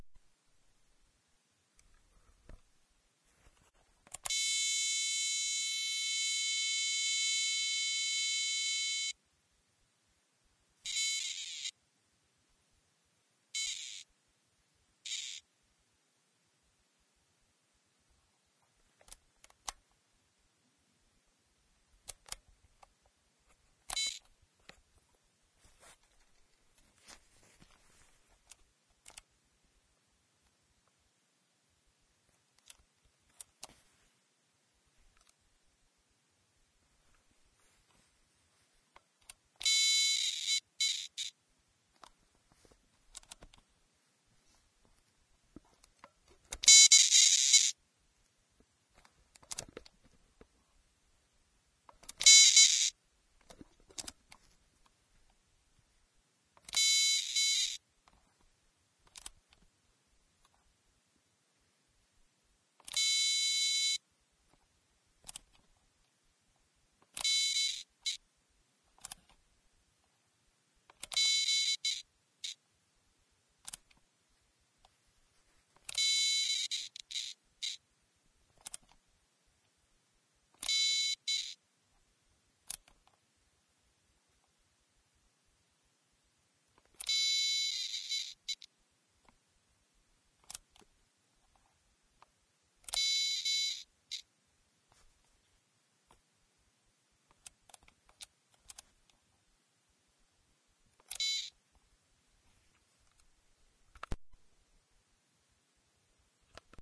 this is the sound of a digital gadget dying.